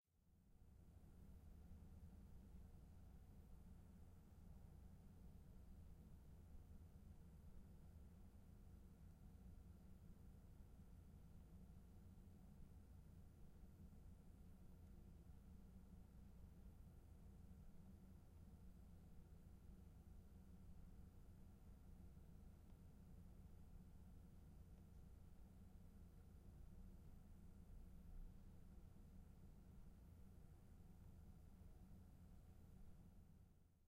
01 room tone low frequency hvac
This is a stereo room-tone recording. It's very low-level and has a low-frequency fan noise in the background. Otherwise it's very quiet. It might be good for patching up holes in dialog.